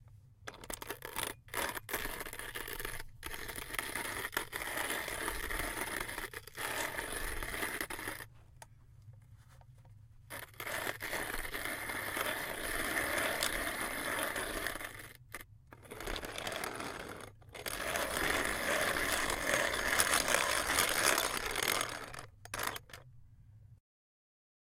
Antique Coffee Grinder With Beans
Grinding coffee beans in a vintage / antique hand-crank coffee grinder (circa 1930s), with a hollow wooden base and drawer to catch the grinds.